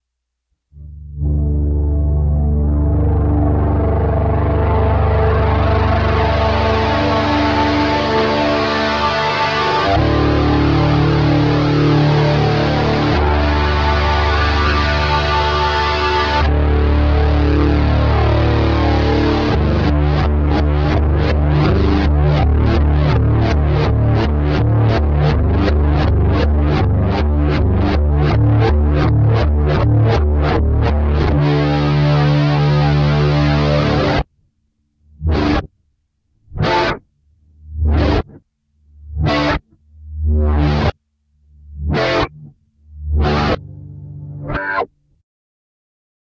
reverse nothing
Another reversed noise from the nothing that is space.
phasing, flanged, shift, phase, ambient, pulsating, grainy, space, noise, nothing, reverse, harsh, stretched